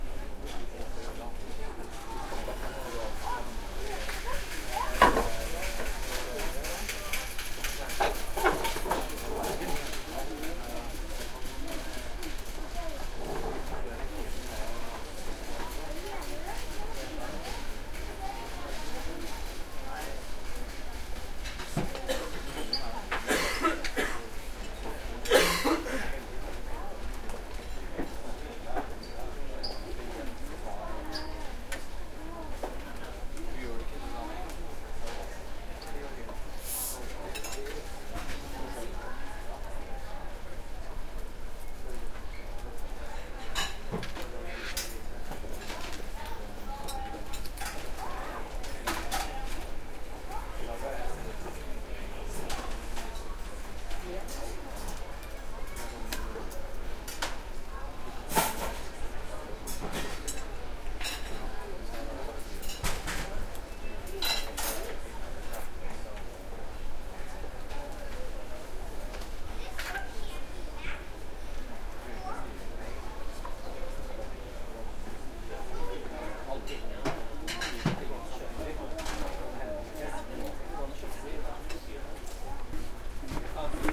restaurant airport
people, restaurant, talking